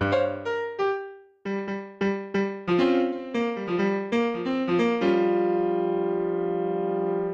Blues for the masses 05
Jazz or blues piano samples.